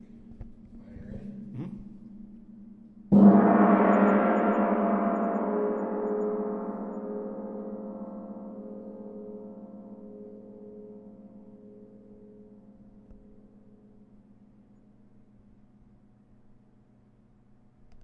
live Orchestral gong